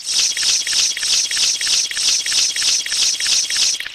Insect chittering
Here is the same audio as spam chunk, but sped up 6 times and repeated. Might be a handy scary insectoid sound effect. Processed in Audacity, where I accidentally found that copying and pasting to a new project can result in a 6x pitch increase.
accidental, found, glitch, machine, message, noise, phone, weird